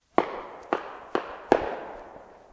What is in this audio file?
A firecracker recorded on the Fourth of July night.
4, pop, july, bang, boom, pow, cracker, fire, explosion